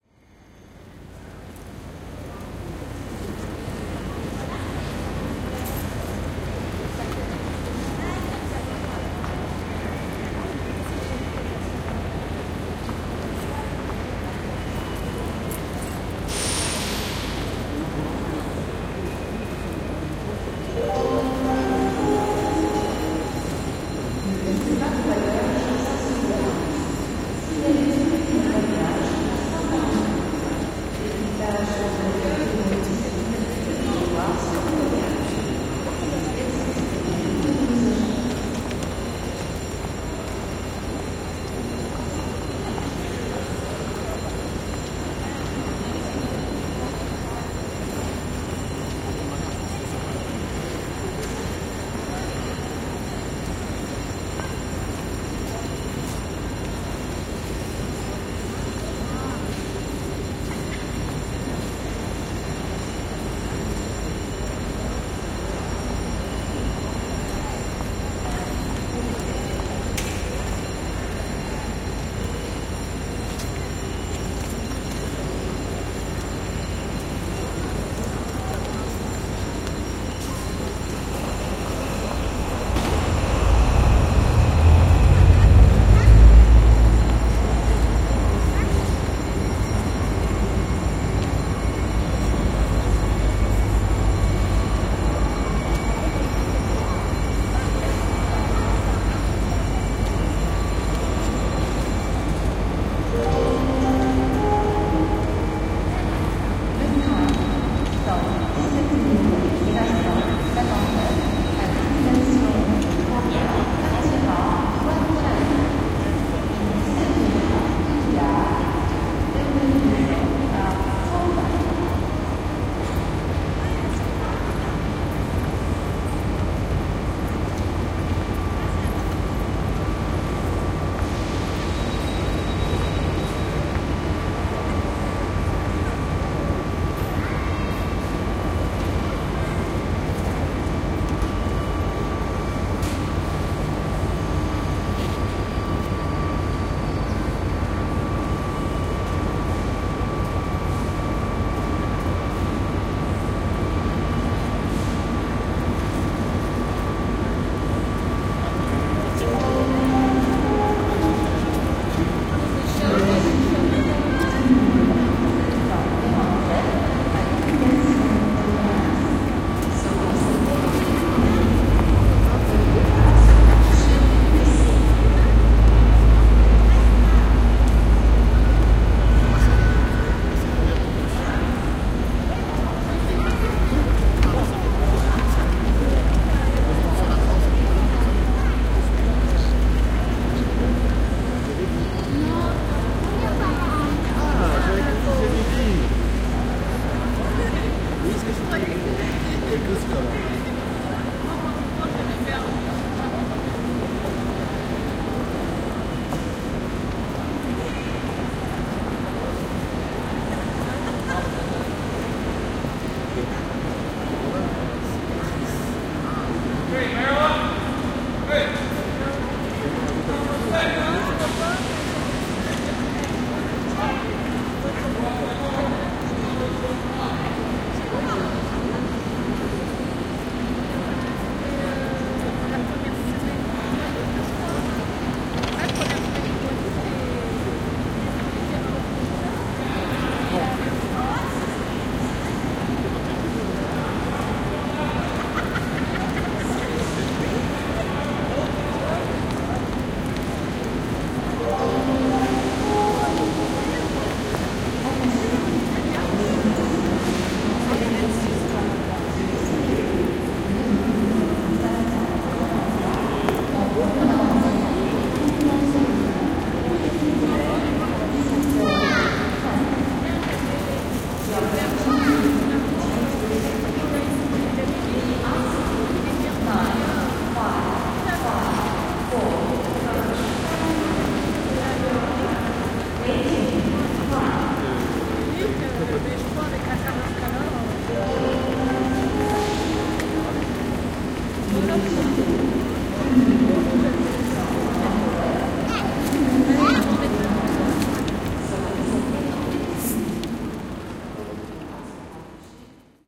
A field recording of Paris gare de l'est ("eastern-network station") on an afternoon. People passing-by, wandering around, heading for their train or exitin the station. A few departure/ arrival/ delay annoucements.
France, gare-de-l-est, hall, Paris, train-station